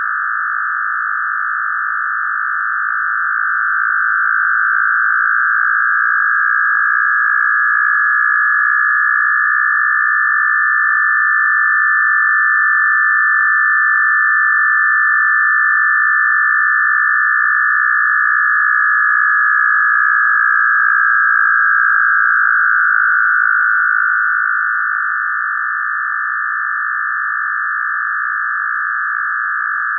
the sample is created out of an image from a place in vienna